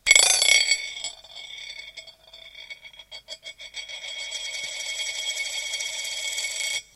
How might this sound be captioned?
rotation1ostschilling
Coins from some countries spin on a plate. Interesting to see the differences.
This one was a 1 Osterreich schilling
rotation, spinning, coins